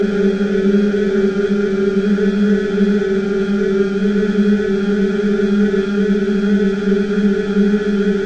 Evil granular synthesis...
grains, evil, synth, granular